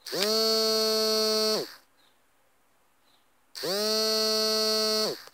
MOBILE VIBRATION
I recorded my smartphone vibrating
Type: samsung galaxy core prime